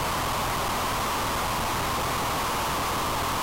A screech with a nasty uncontrollable feeling.
screech, uncontrolable, hardstyle